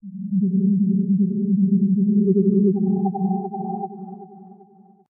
Sounds like magic. Created for a video game.